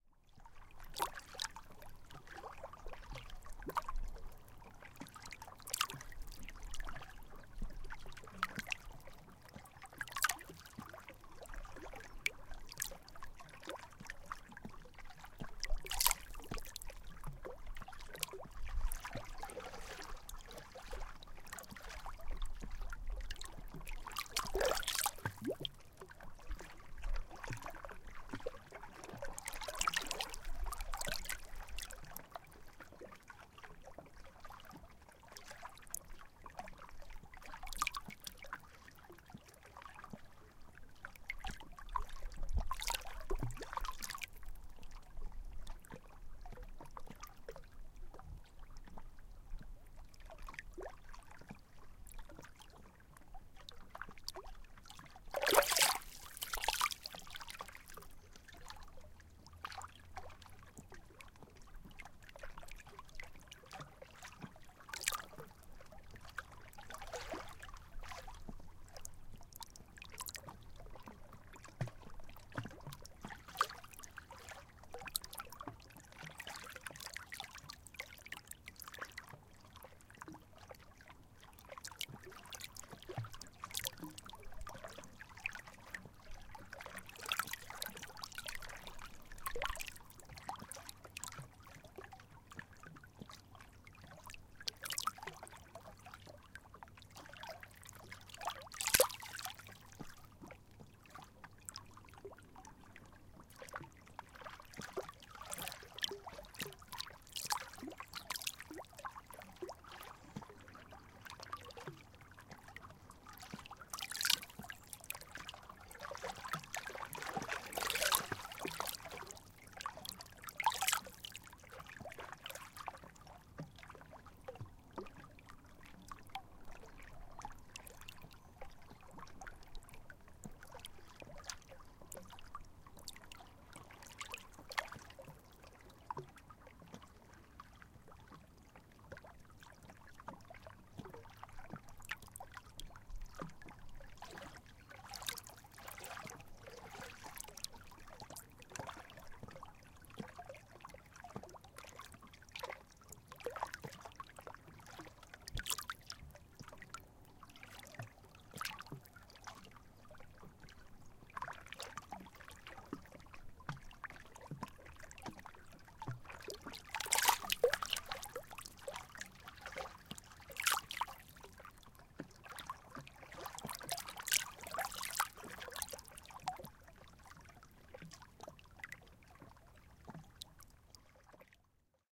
water on rocks, calm, manitoulin
Calm water light lapping onto rocks, summer (2008). Zoom H2 internal mics.
calm, rocks, water